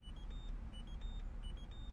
Peugeot 206 - Security Alert
207, car, peugeot, vehicle